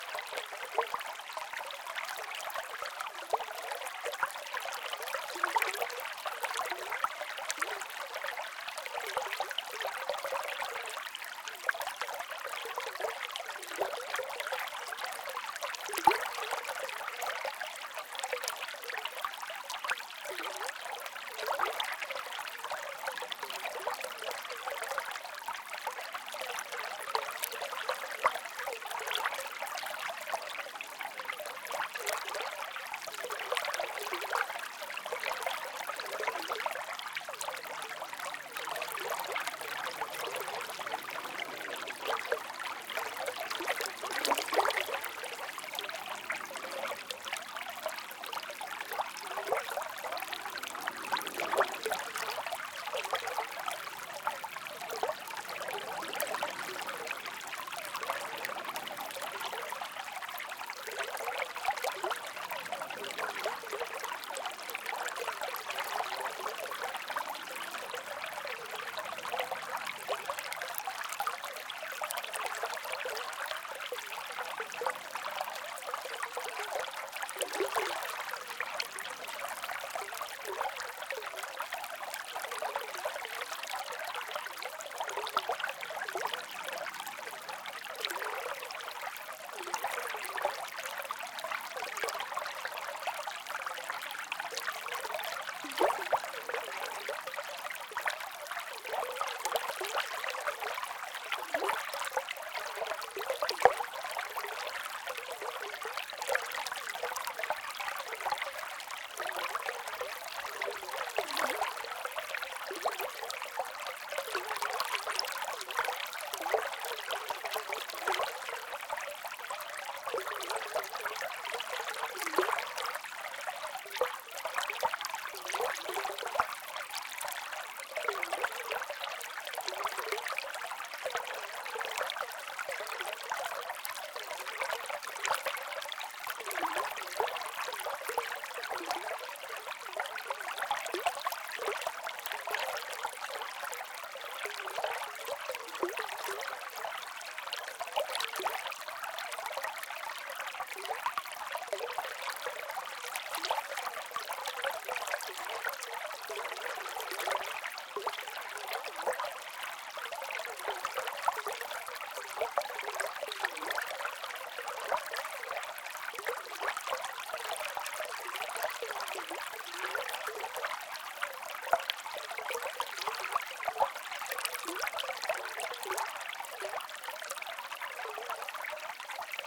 water flows over rock
A half mile into the woods is a small clearing. The clearing is created by a large area of granite, upon which vegetation can not grow. There is a small stream that starts at the top of the granite swath. The water flow changes many times before leaving the granite for more vegetation. This is a close-up recording of water flowing quickly over a bump of rock, which occurs near the top of the granite slab. Recorded with a Zoom H4 on 25 July 2007 near Rosendale, NY, USA.
fall, rocks, water, stream, woods, field-recording